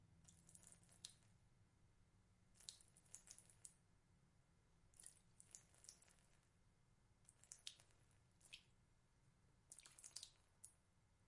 Liquid pouring on floor 1
Five instances of a thin stream of liquid being poured onto a floor.
pour, pouring, splat, water, splash, splatter, floor, wet, blood, poured, spill, liquid